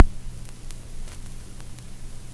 hendrixvinyl nice IR
A collection of stereo recordings of various vintage vinyl records. Some are long looping sequences, some are a few samples long for impulse response reverb or cabinet emulators uses. Rendered directly to disk from turntable.